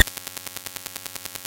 The CPU of this keyboard is broken, but still sounding. The name of the file itself explains spot on what is expected.